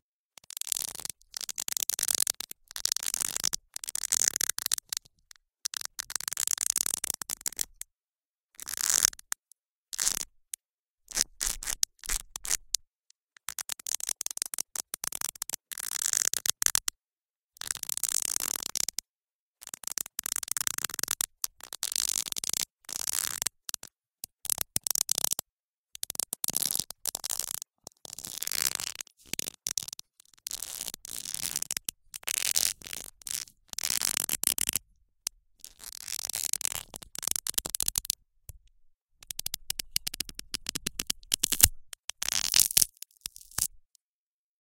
sounds like leather
recorded with Olympus DM-550, iced-lolly sticks pressed against and broke in the end
wood, grit, grate, scrunch, leather, gnash, field-recording, scroop, crunch, creak